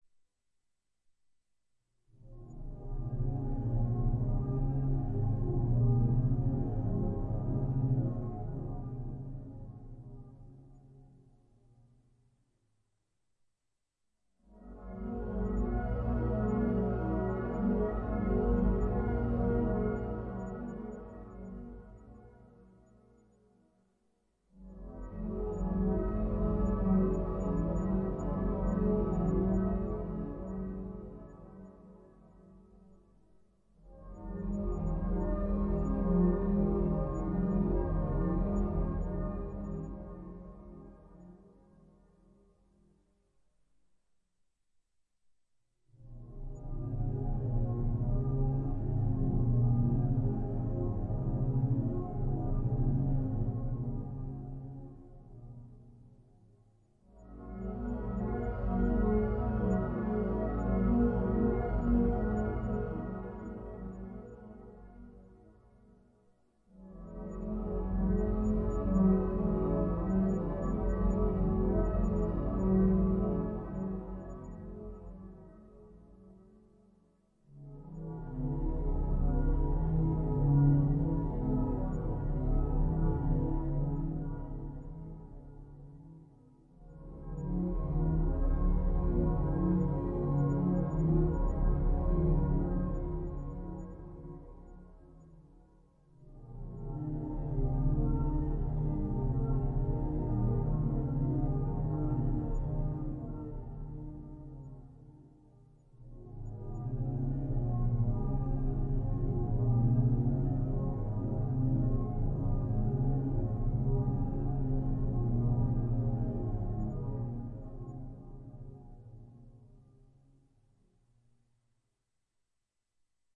relaxation music #24
Relaxation Music for multiple purposes created by using a synthesizer and recorded with Magix studio.